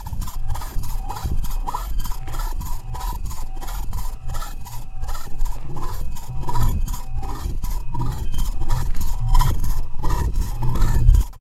manipulated recording of a speaker being scratched